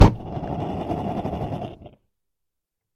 Gas furnace - Ignition tight
Gas furnace is ignited and starts to burn fast.
metalwork, 1bar, 80bpm, flame, gas, ignition, blacksmith